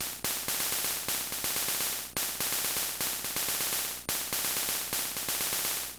Nano Loop - Noise 2
I was playing around with the good ol gameboy.... SOmethinG to do on the lovely metro system here in SEA ttle_ Thats where I LoVe.....and Live..!